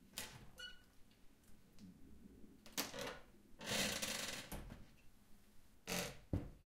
Dresser Door 03
furniture door sound.
door, zoom-h1, mini-bar, furniture, sideboard, open, close, dresser